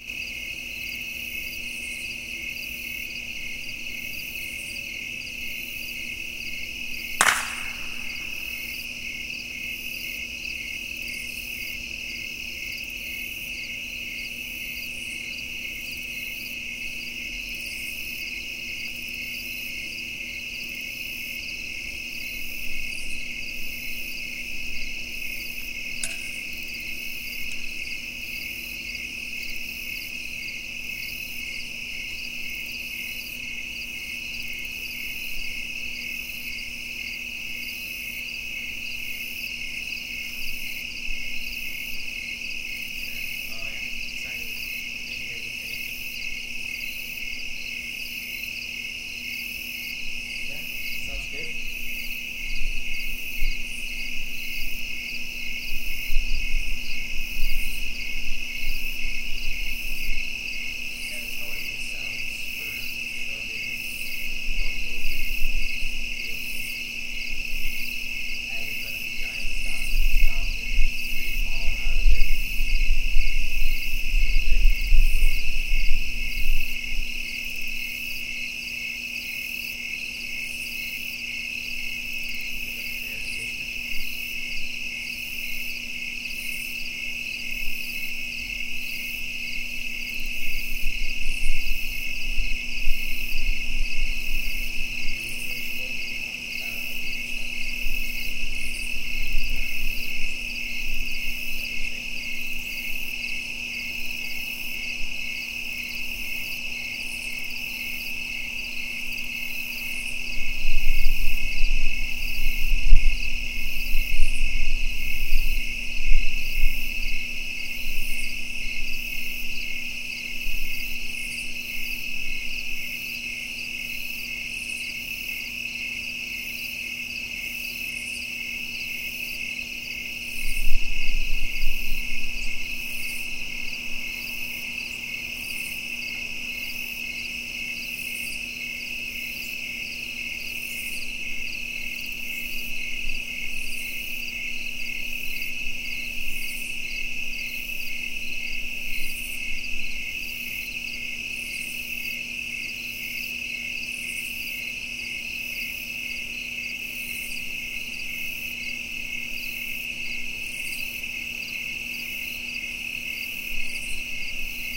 This is a recording of the great outdoors. Some critters sang a song for me after a performance at an outdoor theater in Wisconsin.